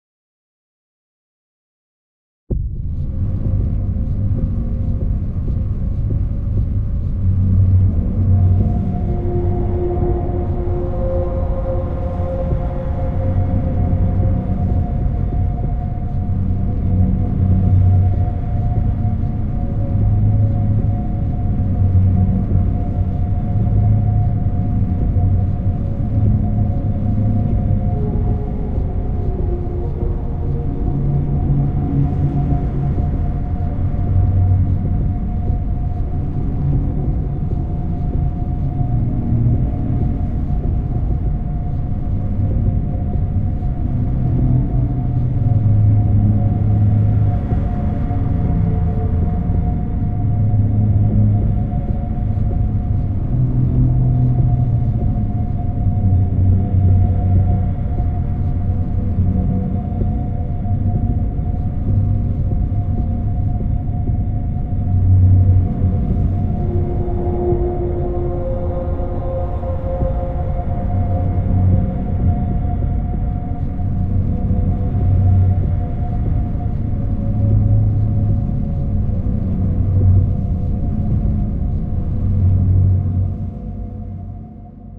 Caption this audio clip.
Shadow King Temple
Sheet music based on spooky and dark tones. From these came the ambient sound installation vision.
SFX conversion Edited: Adobe + FXs + Mastered
Music
Ambiance, Ambient, Film, Cave, Shadow, Ambience, Thriller, Eerie, Temple, Scary, Soundscape, Movie, Adventure, Horror, Drone, King, Atmosphere, Cinematic, Dark, Sound, Fantasy